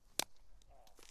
Glove Catch 8 FF007
1 quick, high pitched, glove catch. No smack.
ball, glove-catch, catch